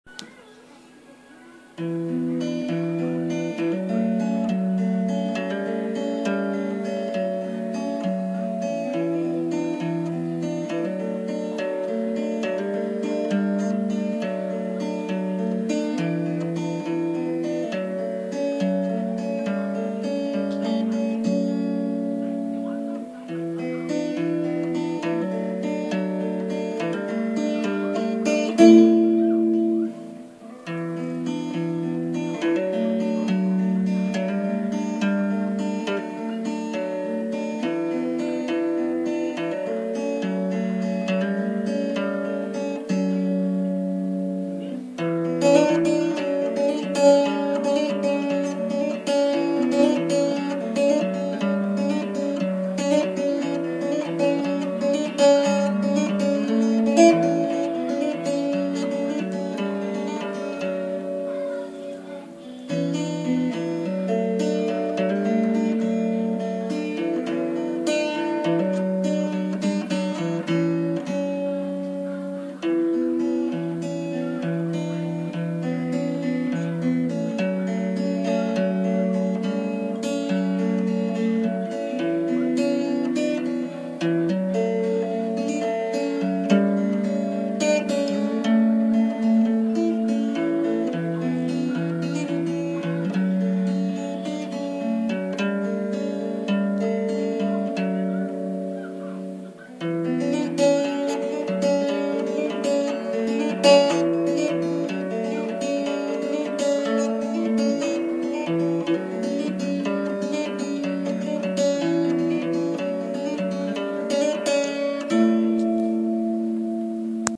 String eckoz
Uneek guitar experiments created by Andrew Thackray